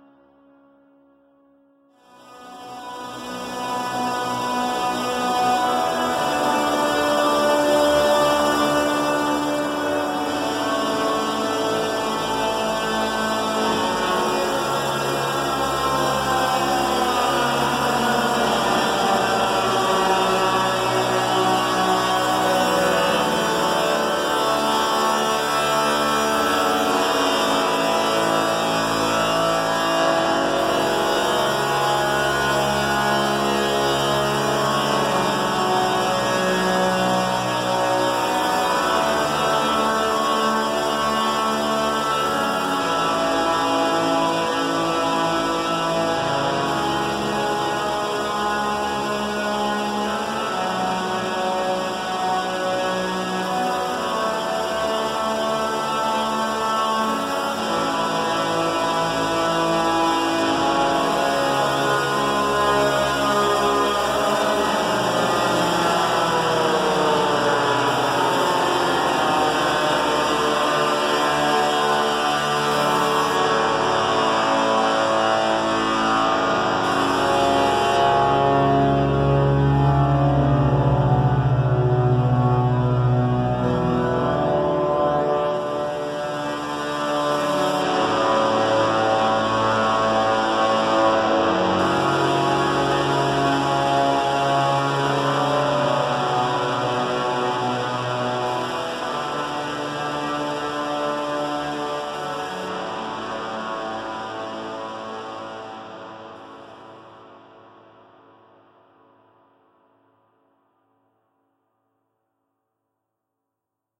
VCV Rack patch
ambient, cinematic, digital, drone, electronic, loop, modular, pad, soundscape, space, synth, synthesizer